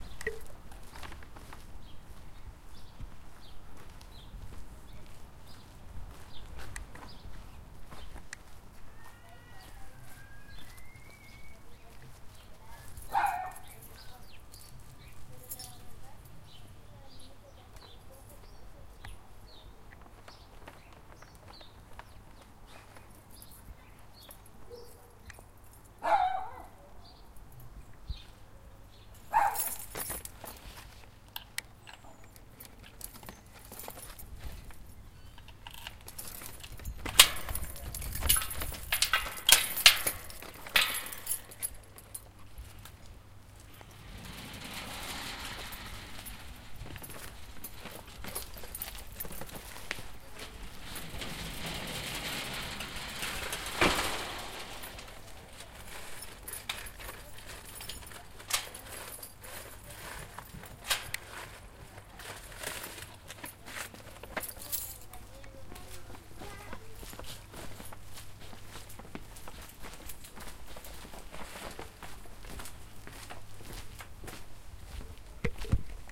opening and sliding metal grid gate with key
Not he most interesting sound of the world, but our arrival to our inn while on vacations in the south of chile. metal sliding grid, mid day, keychain and lock, birds singing in the back. arrival to a calm place
calm, close, closing, door, entrance, gate, grid, key, keychain, keys, lock, locking, metal, open, opening, out-door, slide, sliding, sliding-door